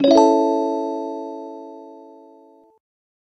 Generic unspecific arftificial space sound effect that can be used in games to indicate an item or achievement was gained
game, effect, jingle, got-item